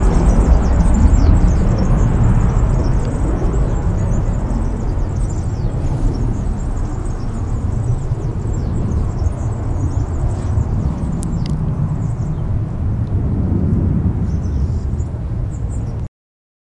A Serin singing. Recorded with a Zoom H1 recorder.